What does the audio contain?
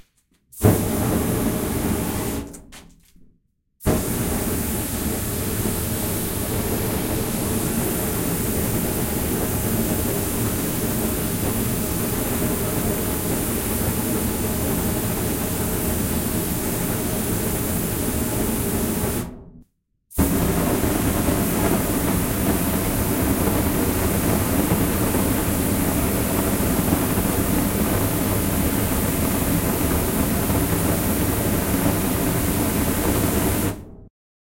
Field-recording of fire using spray can with natural catacomb reverb. If you use it - send me a link :)